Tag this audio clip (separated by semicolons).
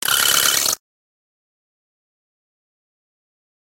future; fxs; fx; electric; computer; freaky; lo-fi; sound-effect; digital; robotic; sound-design